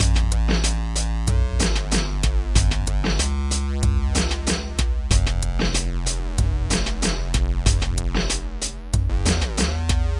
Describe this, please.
94bpm dragger dagger
when hip_hop was real and rough.
created in reason..........bass.......and beat.